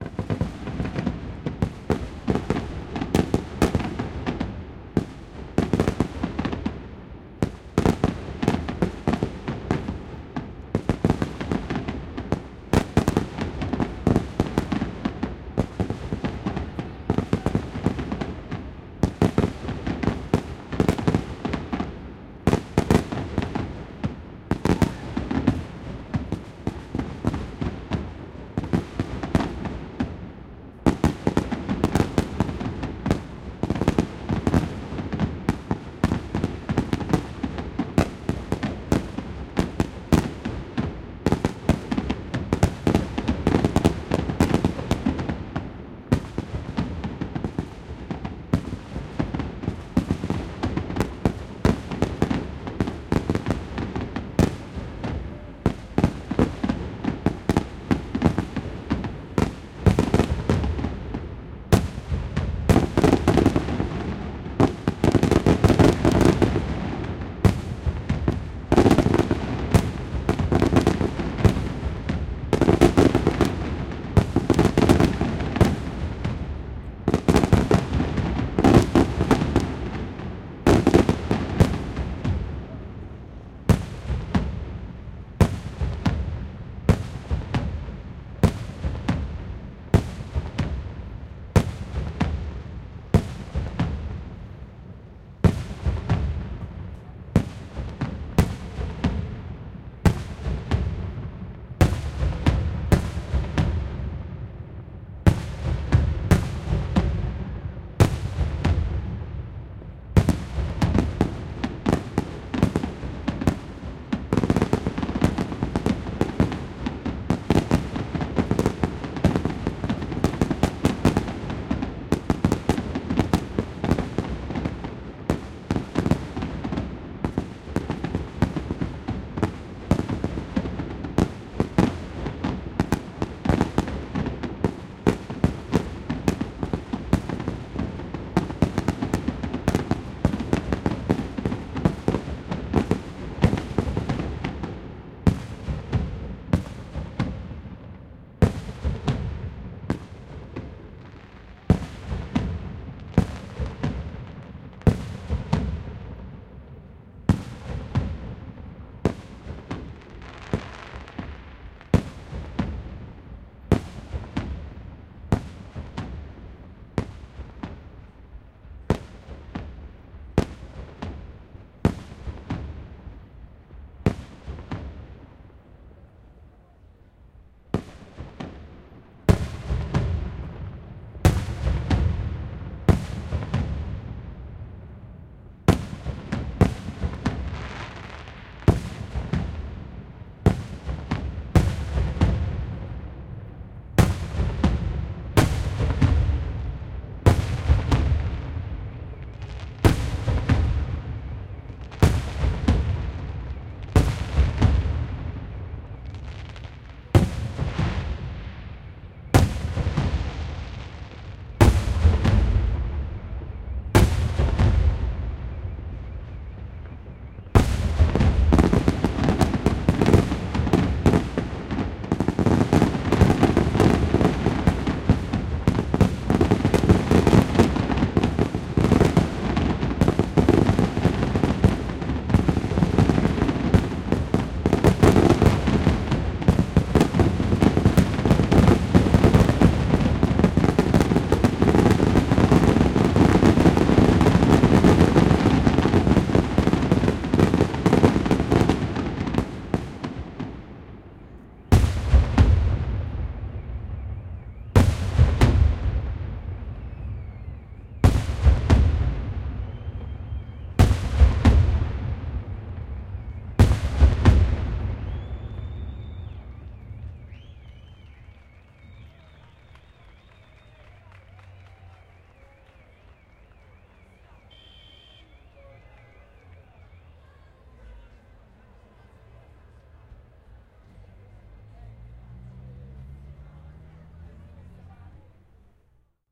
Fireworks - Ariccia
From the window of a house that overlooks the side of the bridge, you can hear the fireworks launched from the plain of Vallericcia one side, while on the other they create a counterpoint with the echo of the fires themselves, slamming on the bridge and between its arches. Recorded with Zoom H4n and Schoeps microphones in ORTF technique.
Dalla finestra di una casa che affaccia sul lato del ponte, si possono ascoltare i fuochi d'artificio lanciati dalla piana di Vallericcia da un lato, mentre dall'altro creano un contrappunto con l'eco dei fuochi stessi, che sbatte sul ponte e tra le sue arcate. Registrato con Zoom H4n e microfoni Schoeps con tecnica ORTF.
delay, paesaggio-sonoro, eco, fuochi-artificiali, fireworks, soundscape